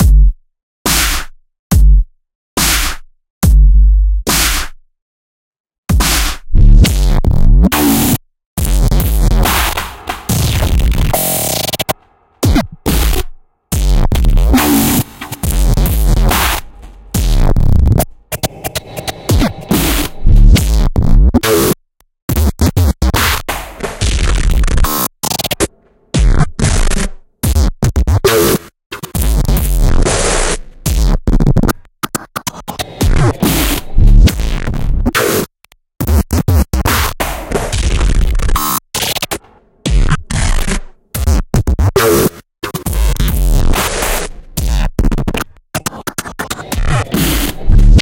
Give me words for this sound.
GlitchDub1 140bpm
a little piece i composed while experimenting with some concepts.
the idea came from using only 2 samples in the whole piece. so everything you hear is made with the two samples (kick and snare, both built from scratch) you hear in the beginning.
The piece gets progressively more intense and processed, so its pretty easy to sample the basic drums and some other effective stuff.